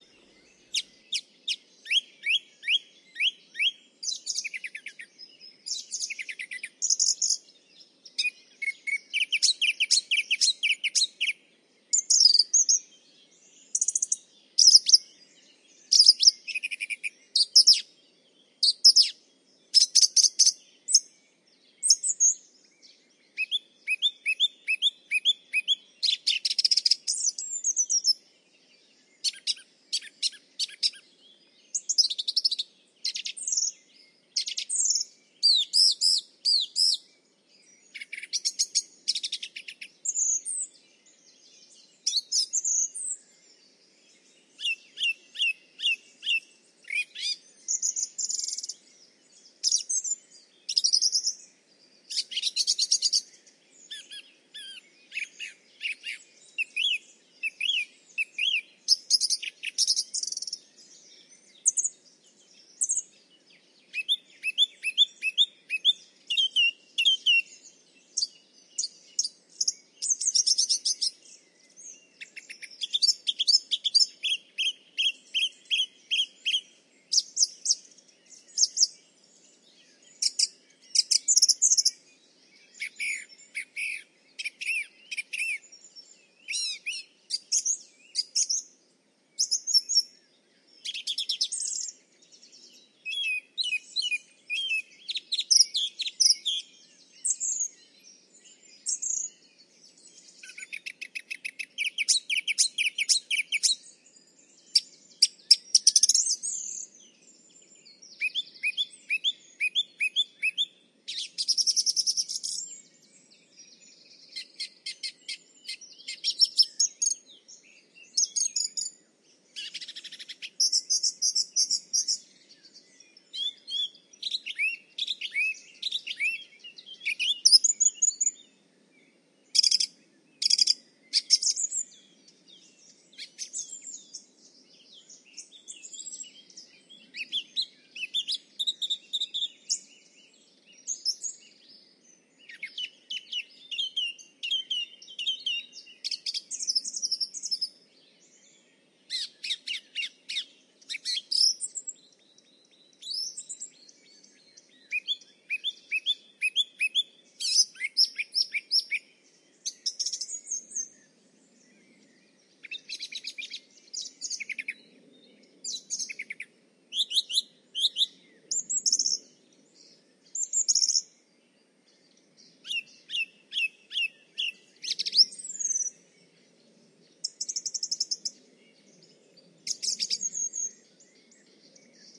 song thrush 2007 05 17
Very close song-thrush singing on a may evening in a natural wetland reserve north of Cologne. Other birds singing in the background. Vivanco EM35, preamp into Marantz PMD671.